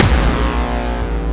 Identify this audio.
HardcoreKick Seq03 02
A distorted hardcore kick
hardcore, kick, distorted, one-shot